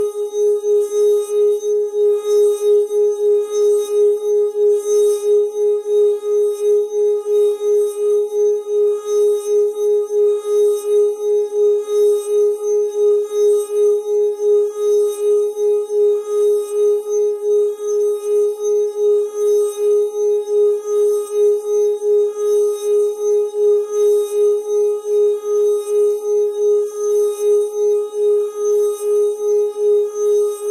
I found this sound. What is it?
Wine Glass Sustained Note G#4
Wine glass, tuned with water, rubbed with wet finger in a circular motion to produce sustained tone. Recorded with Olympus LS-10 (no zoom) in a small reverberating bathroom, edited in Audacity to make a seamless loop. The whole pack intended to be used as a virtual instrument.
Note G#4 (Root note C5, 440Hz).
wine-glass, instrument, sustained, glass